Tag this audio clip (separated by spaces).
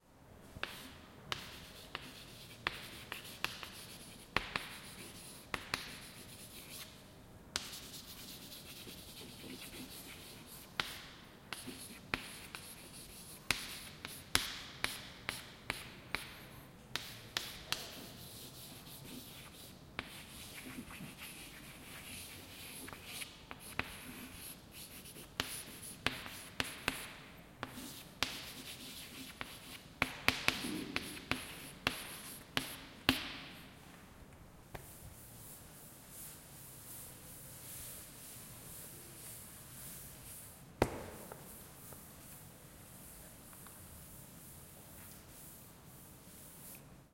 chalk UPF-CS14